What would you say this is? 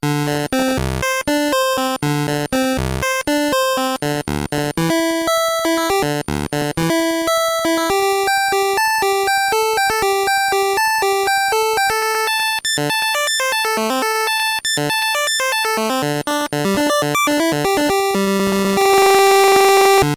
More than Half Pattern 1
glitch, drumloops, nanoloop, videogame, chiptunes, cheap, gameboy, 8bit